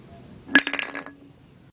Rolling Bottle 05
Sounds made by rolling a small glass bottle across concrete.
roll, bottle, glass